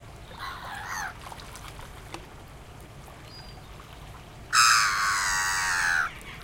Seagull Calls
Seagulls at Dublin Zoo
birds, calls, cries, pond